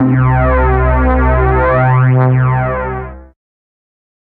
Alien Alarm: 110 BPM C2 note, strange sounding alarm. Absynth 5 sampled into Ableton, compression using PSP Compressor2 and PSP Warmer. Random presets, and very little other effects used, mostly so this sample can be re-sampled. Crazy sounds.
110, acid, atmospheric, bounce, bpm, club, dance, dark, effect, electro, electronic, glitch, glitch-hop, hardcore, house, noise, pad, porn-core, processed, rave, resonance, sci-fi, sound, synth, synthesizer, techno, trance